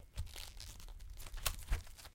Some gruesome squelches, heavy impacts and random bits of foley that have been lying around.
death, foley, mayhem, squelch, blood, gore, splat